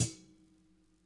This sample is made using a Dolce Gusto machine, in one way or another.
hit, kitchen, percussion